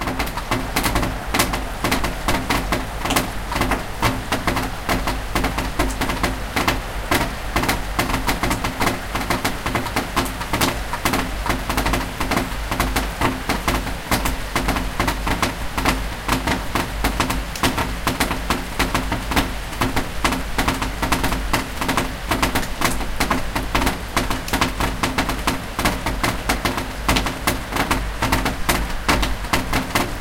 Rain and gutter dripping
Rain and run off from a gutter.